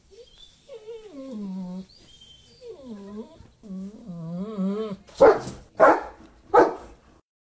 My dog "greeting" guests at my front door.
Recorded on a Pixel 3 phone and cleaned up in Audacity.